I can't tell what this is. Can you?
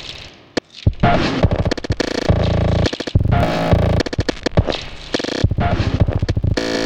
Here is a 3-bar loop at 105 beats per minute. It was created with little parts of field recordings.
glitch, beat, percussion, field-recording, loop